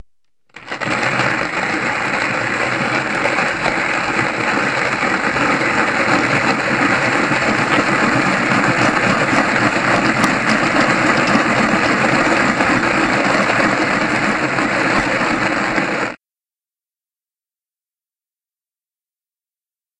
I recorded myself using a hand-grinder to grind come coffee beans, but it works for nearly any sort of grinding sound. Good for videos with machines and such.